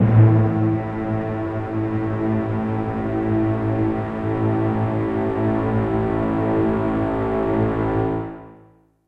Spook Orchestra C4
Spook Orchestra [Instrument]
Orchestra, Instrument, Spook